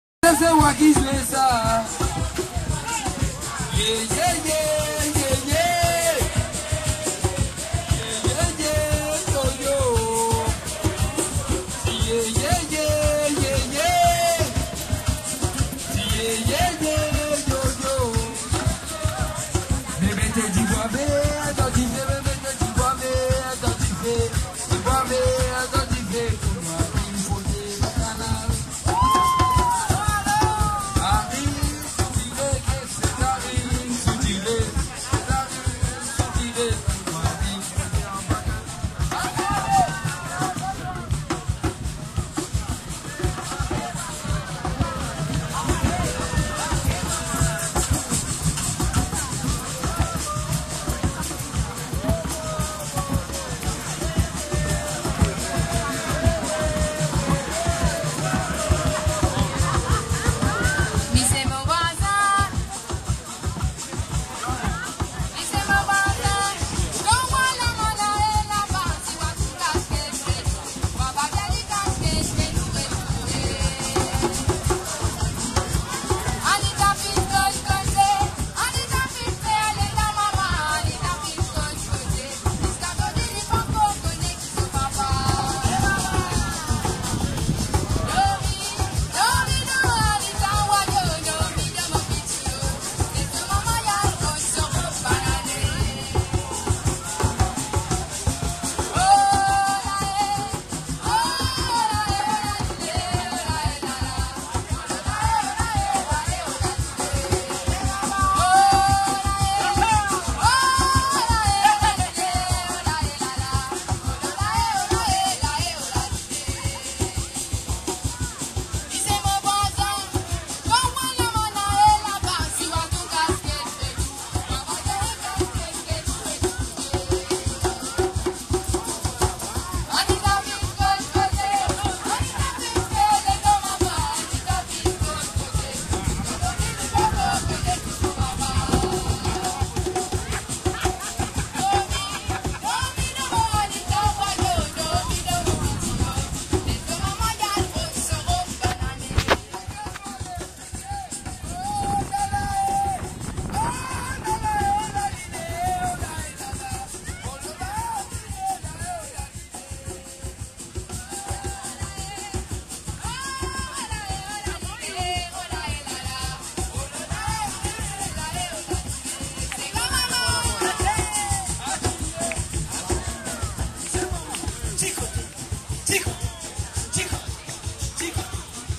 Mauritius Beach Party #2
Children, Field-recording